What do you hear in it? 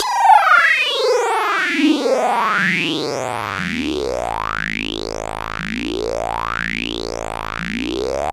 These samples come from a Gakken SX-150, a small analogue synthesizer kit that was released in Japan 2008 as part of the Gakken hobby magazine series. The synth became very popular also outside of Japan, mainly because it's a low-cost analogue synth with a great sound that offers lots of possibilities for circuit benders.
analog, electronic, gakken, hardware, japan, kit, noise, sound, sx-150, synth, toy